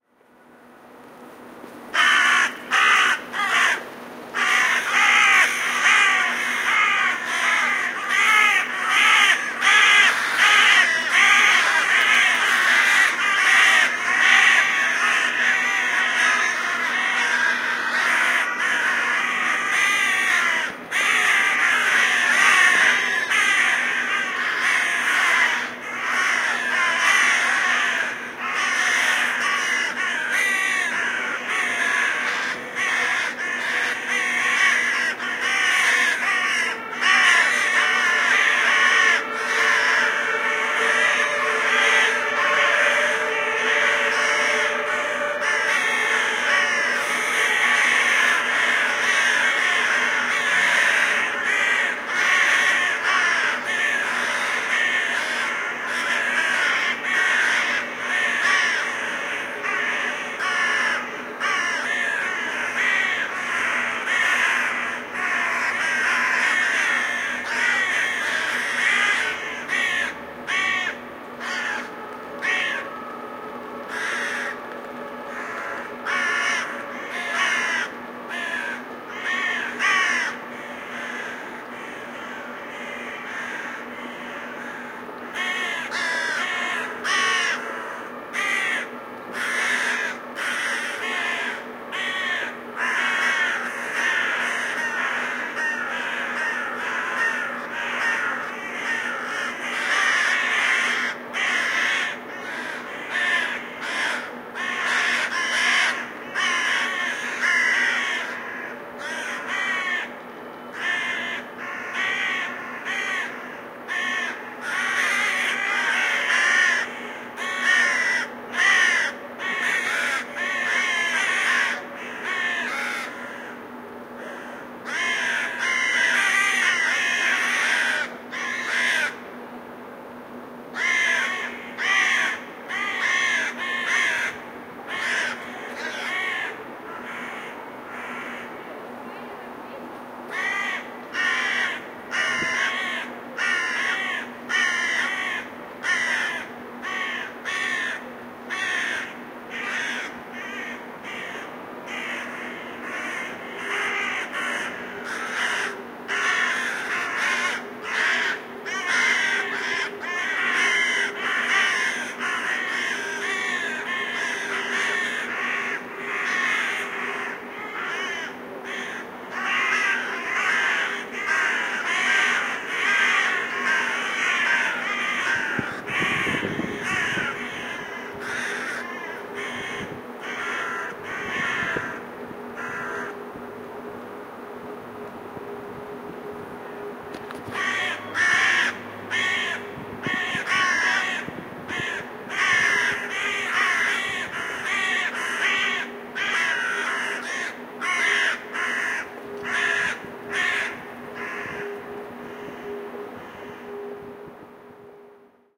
Raven Army
A large group of ravens making some big noise for several minutes. Apologies for the occasional sirens in the background, if you find this sample useful I am sure you can cut out the parts that work best for you.
bird, birds, crow, field-recording, nature, raven